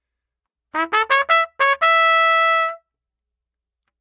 Charge in A
air, blow, charge, mus152, Trumpet, water